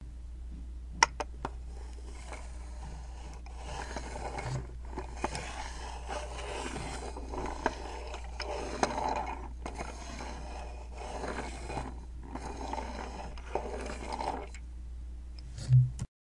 Matchbox car
creak, squeaking, children, squeaky, creaky, squeak, car, wheel, toy, matchbox-car